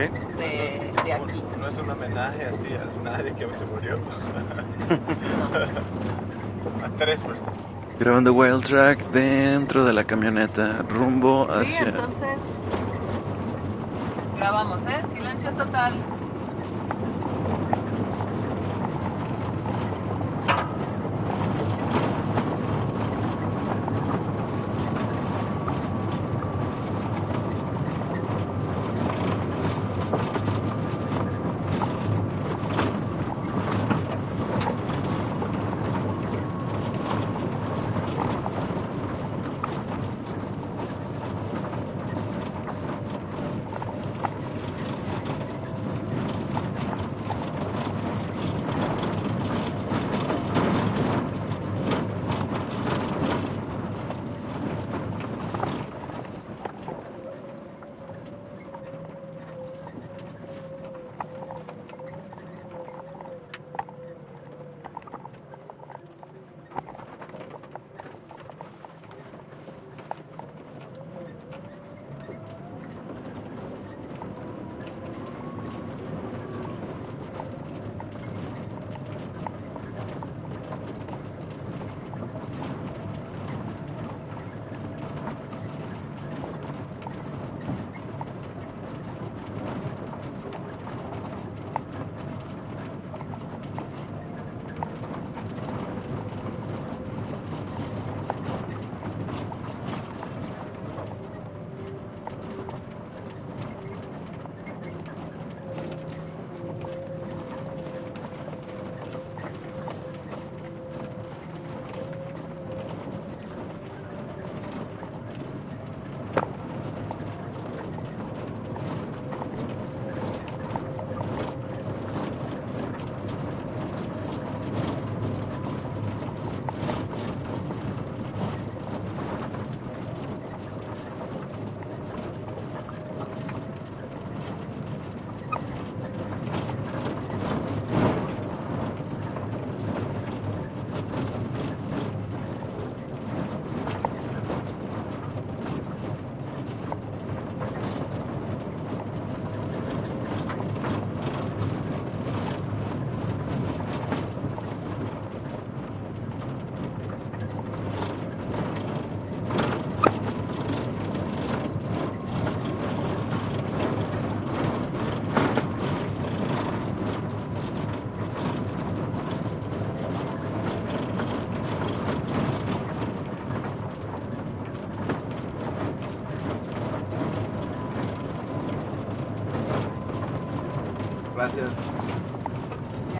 REcorded with ZOOM f4 + At 385b, in a town of Jalisco, Mexico.
ambience is for a proyect call Music Hunters.